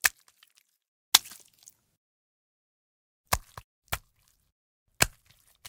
fish slap ground or snow writhing wet
fish, wet, ground, flop, snow